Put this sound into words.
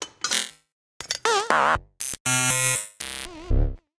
Abstract Percussion Loop made from field recorded found sounds